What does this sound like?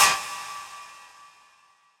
Sawh 00 cymbal
Modified saw sound.
clash, techno